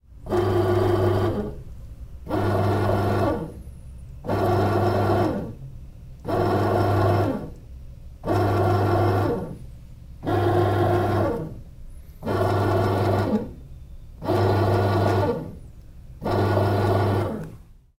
Servo 3 Panera
Sonido de movimiento robotico, sacado de una maquina de hacer pan.
Grabado con Roland R-05. (24-48)
motion, mechanic, Servo, Robot, robot-movement, movement